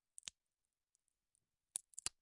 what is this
Breaking open an almond using a metal nutcracker.